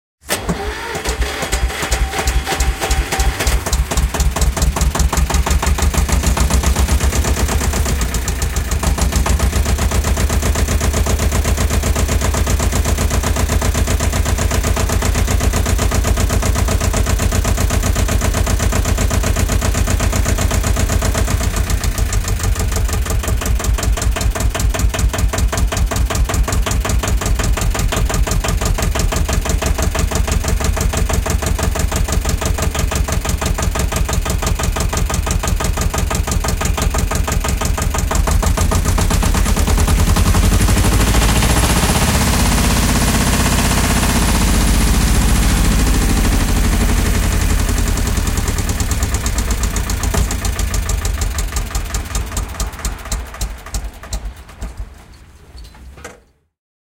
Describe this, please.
Tractor Kleinland Pony
Sounds from the engine of a Kleinland Pony,
recorded on February, 19th 2015,
at Traktormuseum in Uhldingen at Lake Constance / Germany
Kleinland Pony facts:
Year: between 1949-1959
9 Horsepower